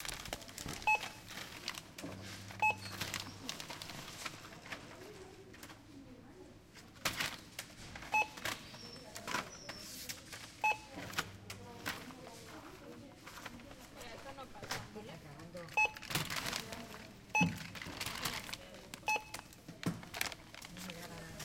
We are in a supermarket and we hear the sound of the barcode reader.
Estem a un supermercat mentre la caixera està passant els productes pel lector del còdi de barres.